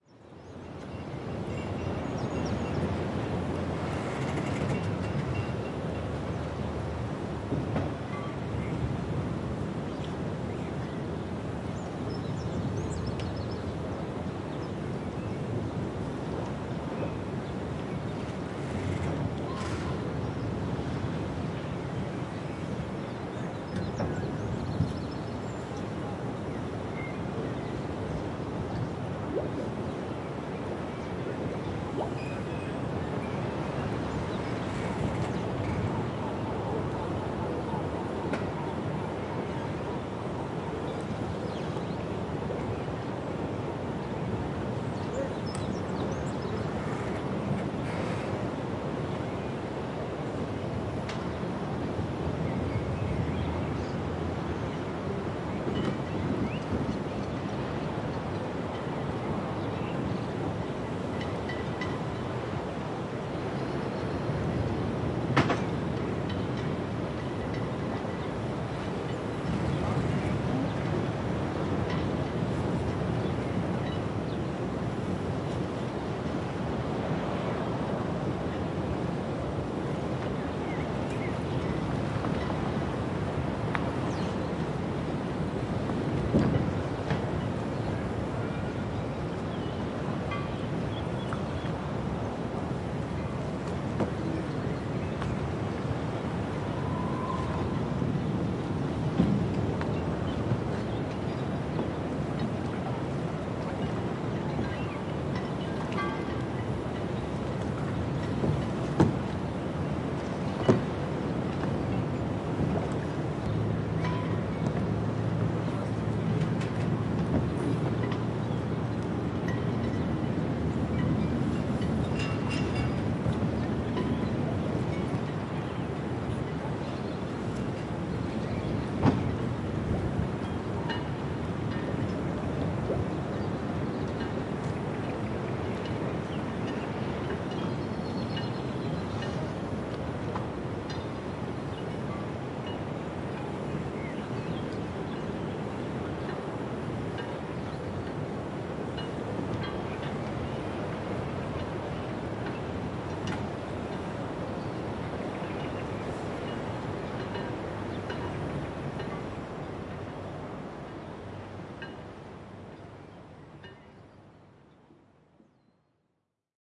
Quiet ambiance in a small harbor.
Faraway ocean beside stone walls, bubbles, birds, rare voices, little boat mats under the wind. Very few activity.
Port Tudy, Groix, Brittany, France, 2021
recorded with Schoeps MS
Recorded on Sounddevice 633